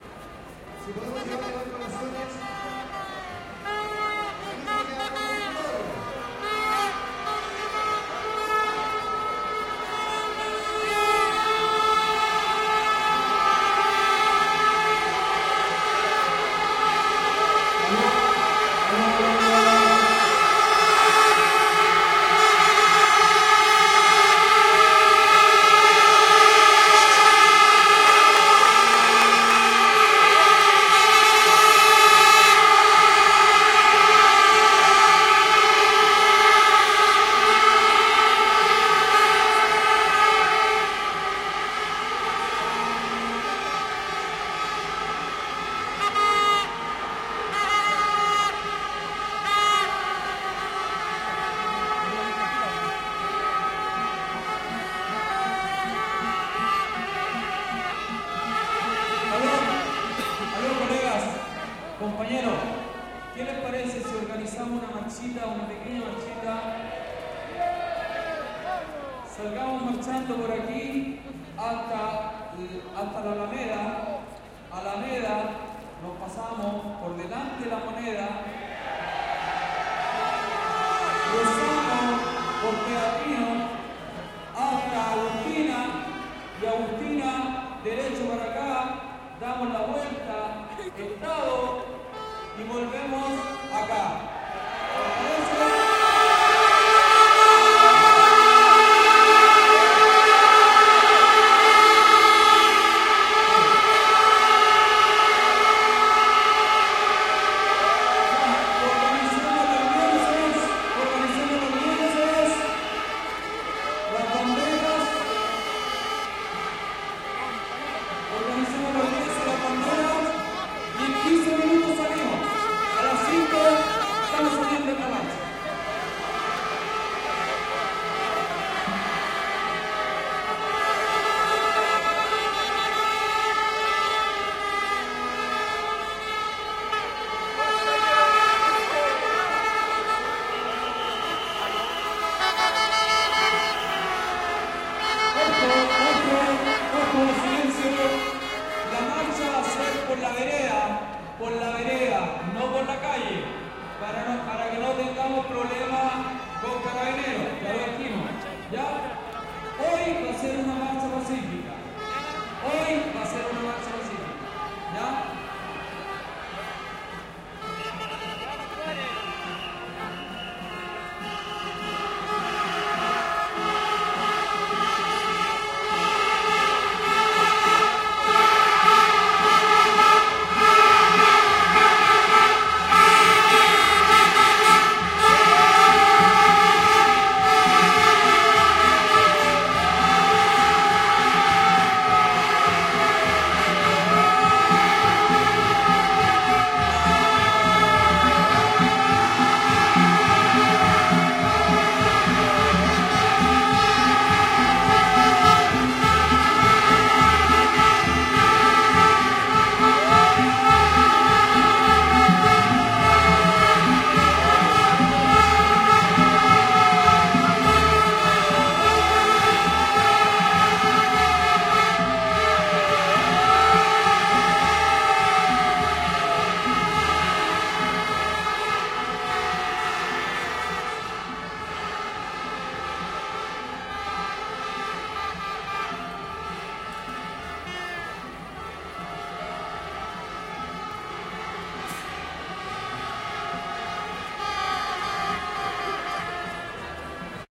huelga banco de chile 03 - recorrido de la marcha y la medallita
vuvuzelas in crecendo
se propone el recorrido de la marcha
termina con la medallita por chico trujillo
ahumada, banco